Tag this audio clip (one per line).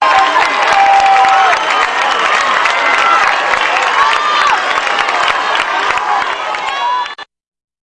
LOUD
PEOPLE
SAMPLE